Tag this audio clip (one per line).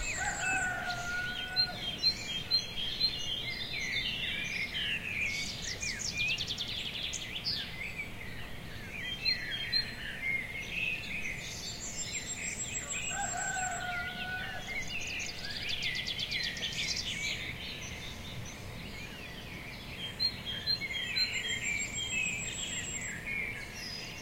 gezwitscher
bird
twitter
gel
twittering
vogel
natur
birdsong
Vogelgesang
nature
forest
field-recording
morning
v
birds
Morgen